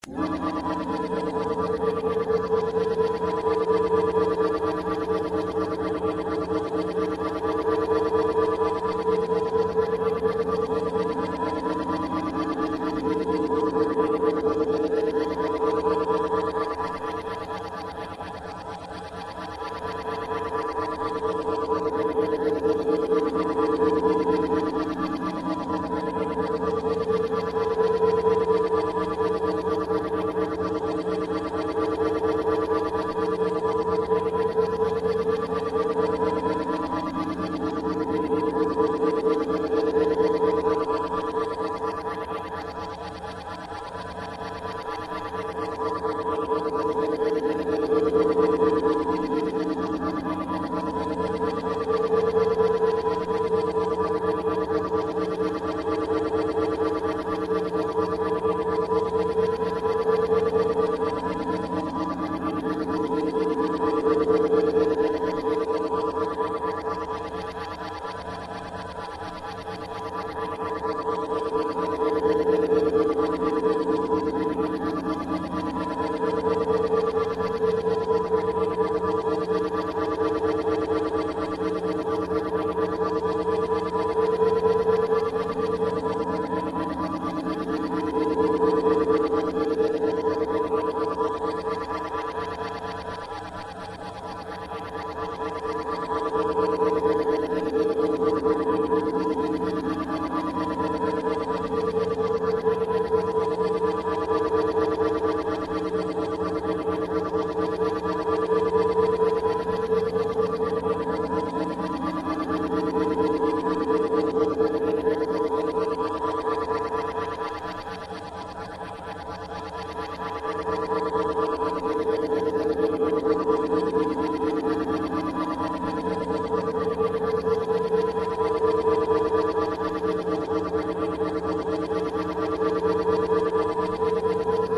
Alien Reality warping machine
My voice saying most of the word "world" taken from something else that I had been playing around with, each channel run through a process of Goldwave's mechanize effect, low-pass filter, and mechanize again with a different frequency in each channel to create a single sideband effect. Then ran it through pitch change with low fft, different pitch in each channel, to add more odd frequencies to my voice, then blended the channels together with through-the-skull effect, and finally snipped that bit out of the whole file and pasted several times. Then used Goldwave's reverb, and then through the skull again on the new file, used Audacity's phaser and a touch of wawa from ValhallaFreqEcho.
alien, drone, futuristic, jump-drive, phasing, reality-warping, science-fiction, sci-fi, scifi, space, spaceship, space-time, warp-drive